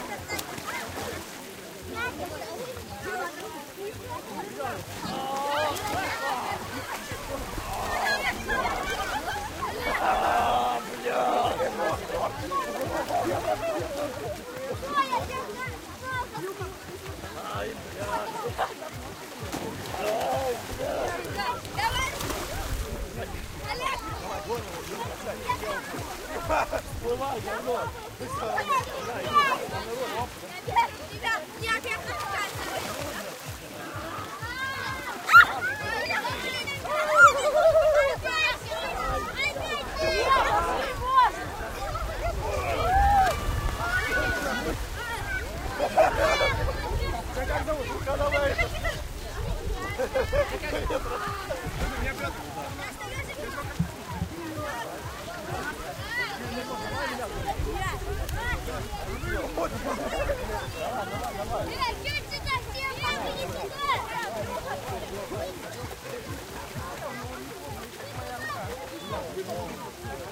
Omsk Victory park 8
Athmosphere in the Victory park, Russia, Omsk. Deep in the park, lake. People bath. Hear cries of joy, gurgling, water splashes. Obscene vocabulary.
XY-stereo.
Omsk, Russia, athmosphere, gurgling, joy-cries, lake, noise, obscene-vocabulary, victory-park, voices, water, water-splashes